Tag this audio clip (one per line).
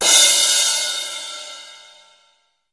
acoustic
drum
guigui
mono
set